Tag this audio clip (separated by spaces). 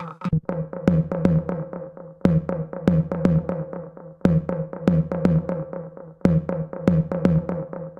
synthesized fx-pedal dub-techno zoom-pedal dub-step dubstep synthesizer stomp-box glitch-hop dark-techno techno electronic-music effect-pedal analog-fx ambient drumcode